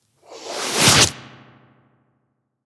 fast slash attack. It is just me passing my nails through the pillow, but then I layered it and used a little reverb (different for each layer) and a really really small delay in just one of the layers. Made using FL studio.